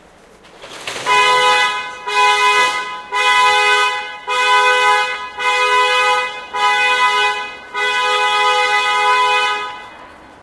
car.horn
a car horn played close /bocina de coche sonando muy cerca
city, field-recording, alarm, horn, machine, streetnoise, claxon